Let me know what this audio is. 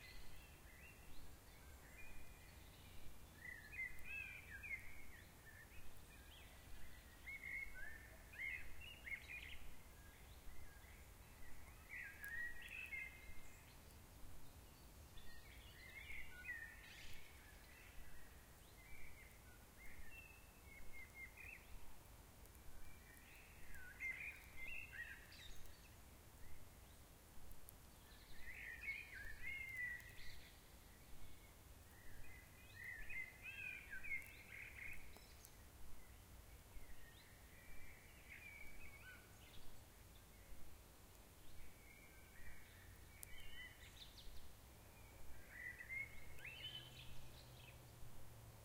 Forest Birds 02
Some birds tweeting in a german forest
unfortunately there are some artefacts created by the windshield
Tweet, Zoom, spring, summer, Park, Environment, Windy, H4n, Bird, Peaceful, Trees, Field-Recording, Nature, Birdsong, Forest